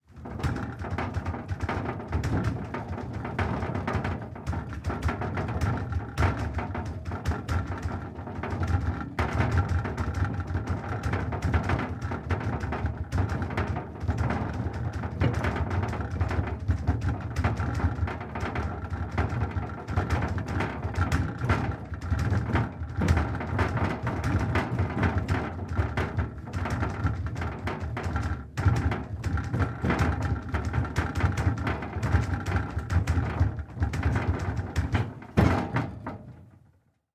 freezer metal rattle banging from inside
banging, freezer, from, inside, metal, rattle